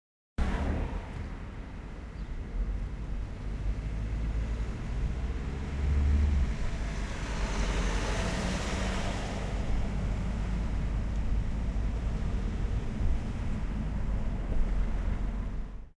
Sound of cars in the city.
Cars, Movement, Sound